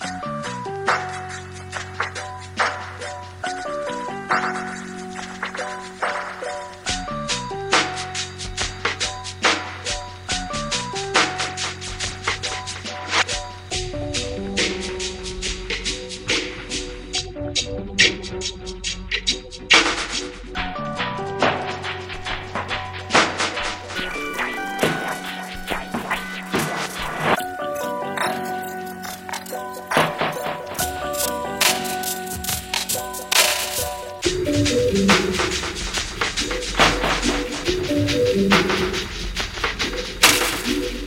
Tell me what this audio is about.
HIPHOP - DUB LOOP
LoFI Beat with different effects + piano Made on the MPC4000 & SP202
hiphop, lofi, triphop